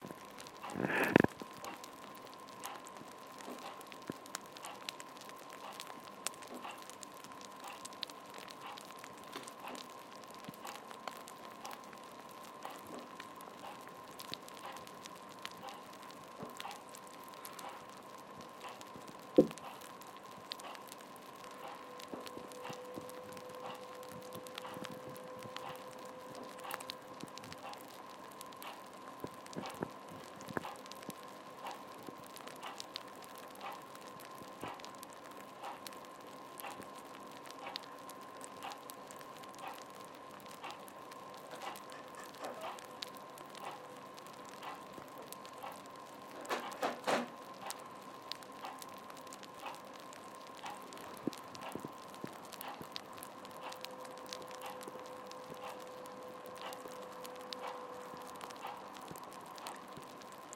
the oddly repulsive sound of rice-crispies popping up close